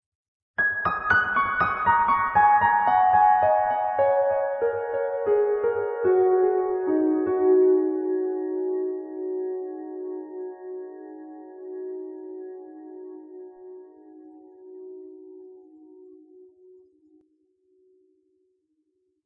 [12] s-piano penta down 2
Piano piece I played on my Casio synth. This is a barely adjusted recording with a record-tapeish chorus already added in the synth.
downwards, piano, notes